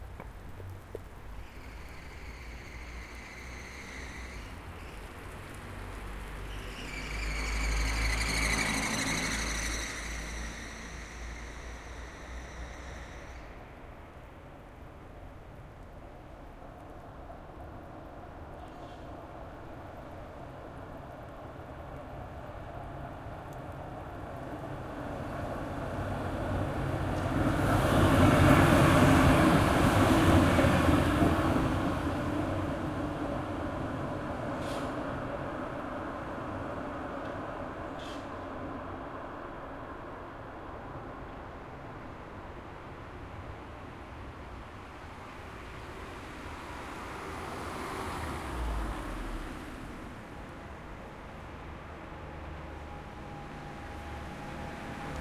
Tram passing buildings dry close xy120
Field recording of a tram passing close by in a city. Recorded on a Zoom H4n using on-board microphones in xy120 degree configuration.
buildings, field-recording